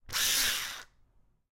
Drill Sound 1 4
Drill, Bricolage, Sound, Engine, Machine